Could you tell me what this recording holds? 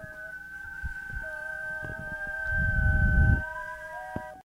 noisy whistles in f#
organic, whistles, noisy